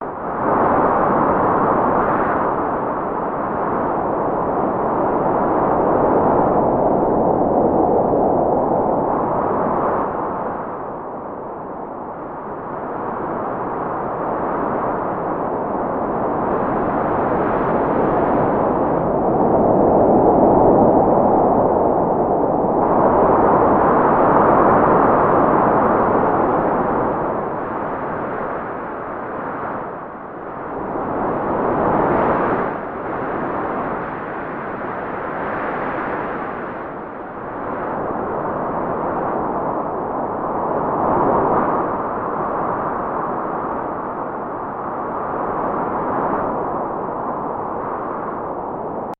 Synthesized sound of a strong wind
ambience, synthetic